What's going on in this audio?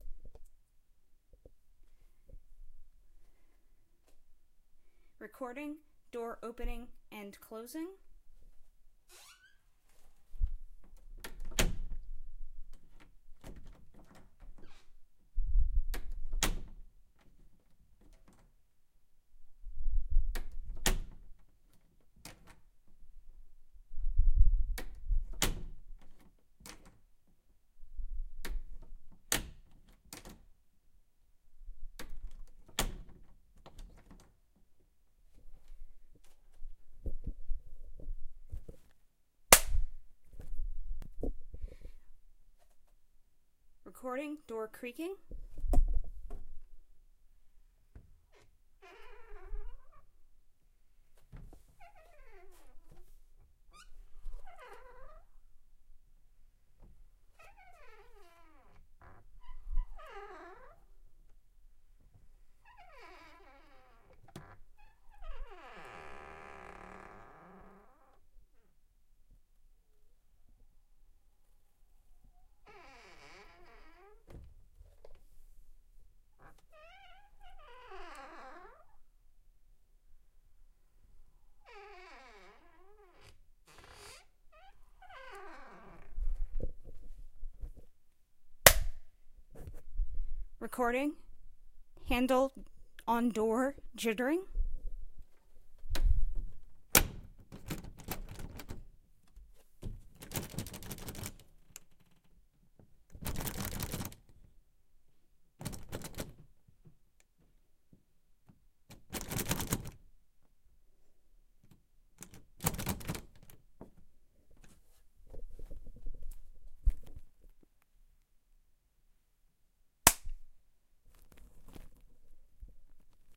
door open close
slightly squeaky door being opened and closed
click, close, closing, clunk, creak, door, doors, handle, open, opening, shut, slam, soft, squeaky, wood, wooden